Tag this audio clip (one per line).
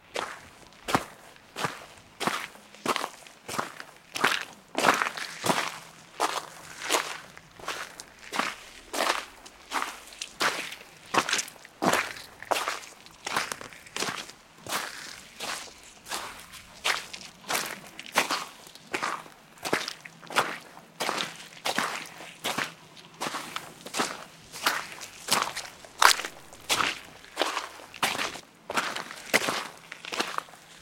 boots
footsteps
mud
muddy
mud-squish
natural
natural-sounds
sound
sound-clip
sound-design
sound-effect
walking